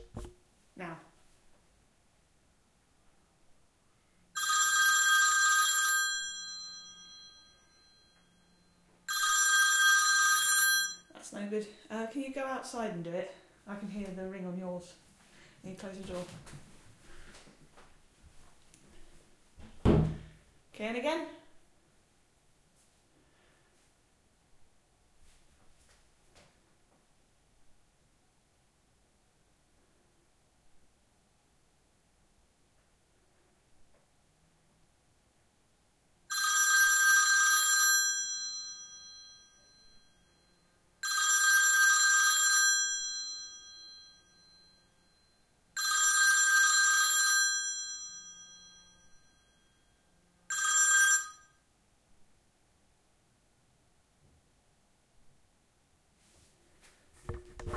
phone ringtone bell
iPhone bell ringtone, recorded close and in a hard surface area.
Recorded on H4N Zoom